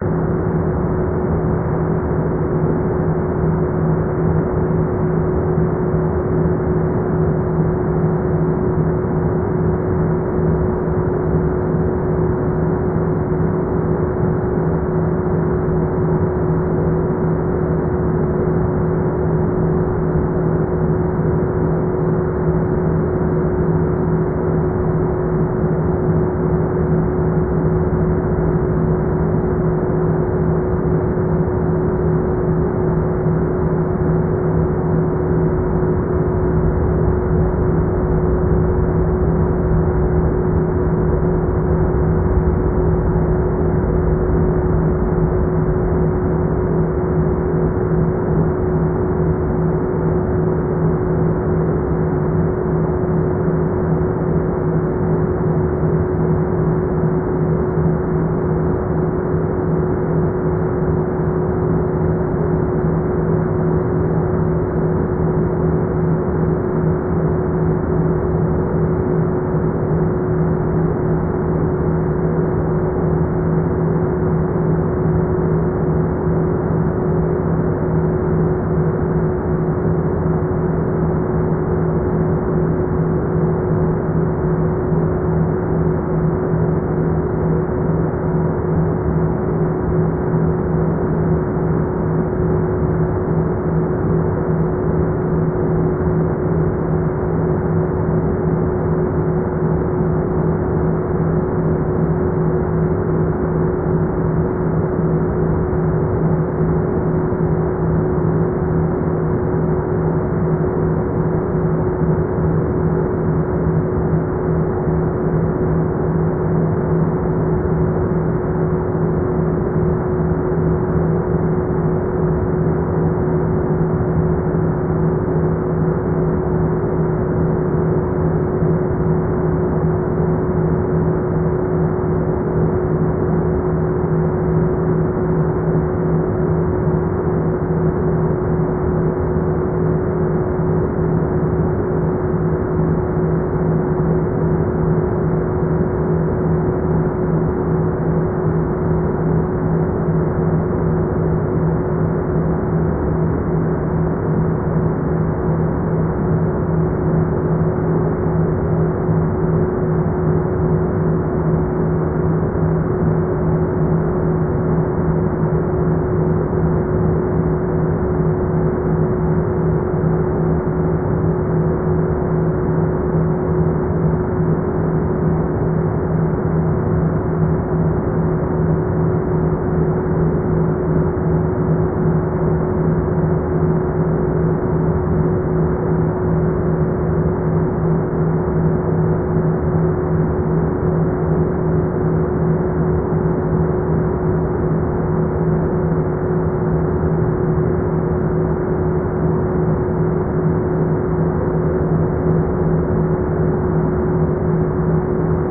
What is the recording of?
big drone lowered
Probably some water pump at work; the sample was cleansed and its pitch lowered to get a constant rich drone.
drone, industrial, lowered, pitch, pump, urban, water